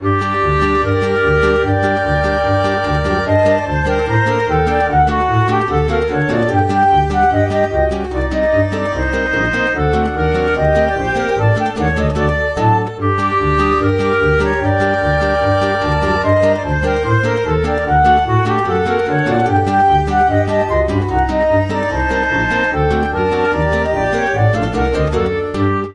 Village Wedding
It's the party of the year! Noone is sitting anymore.
The tables are sticky from the Slivovitz anyways.
You can do whatever you want with this snippet.
Although I'm always interested in hearing new projects using this sample!
clarinet, eastern-europe, fragment, guitar, music